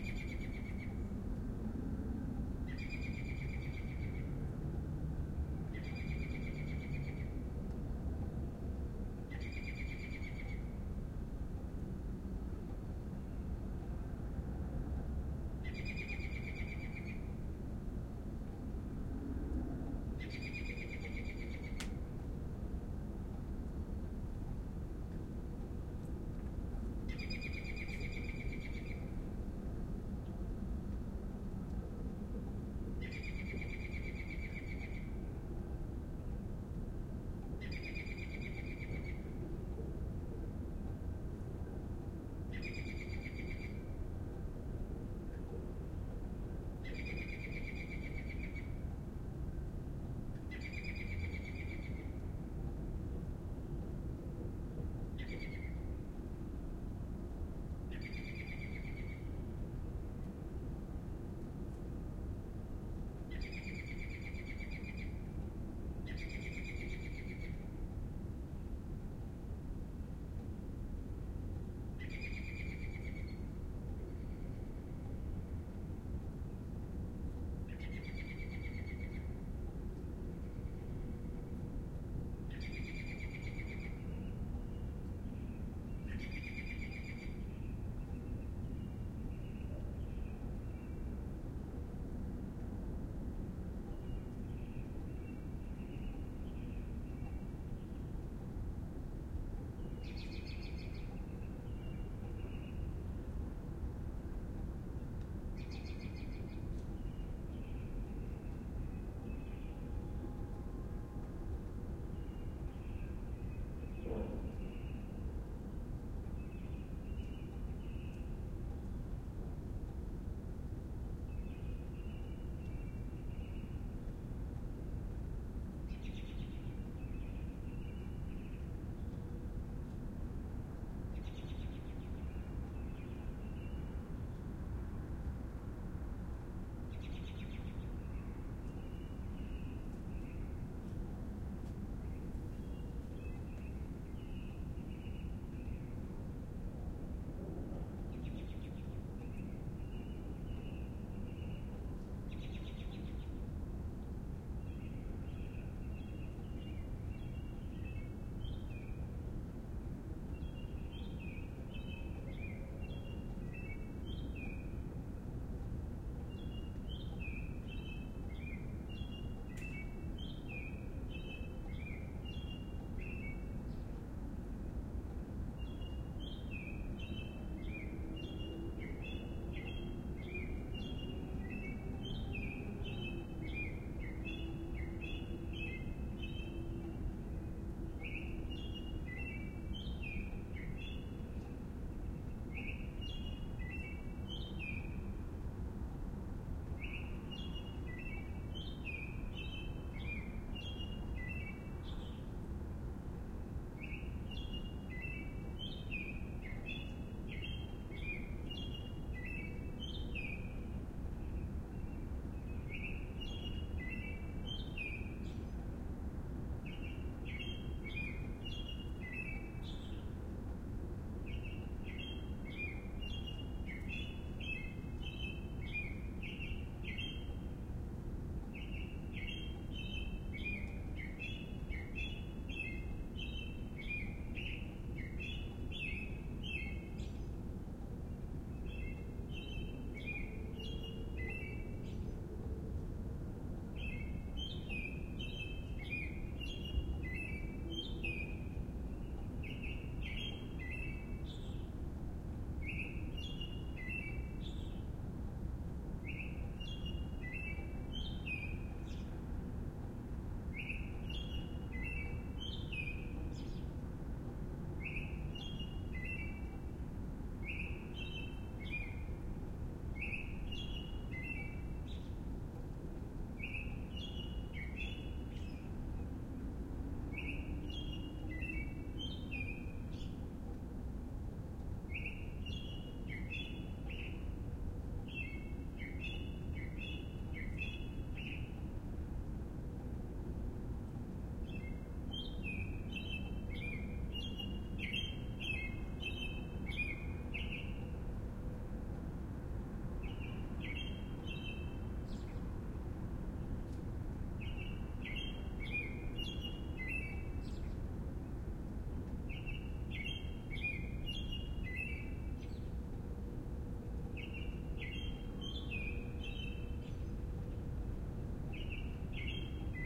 very early morning bird city rumble amb 77mel 19-4-13
Recorded with Sony M10 from the attic of my house in Toronto.
bird, city, early, morning, rumble, very